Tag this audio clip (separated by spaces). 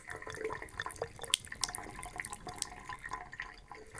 ambiance bathroom drain drip loop water